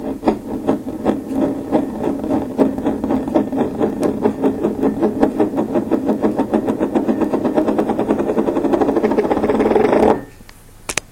puodel sukas
tea mug spinning